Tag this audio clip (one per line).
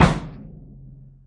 Bassdrum
LiveDrums
MobileRecord
Pre-Mastered
Sample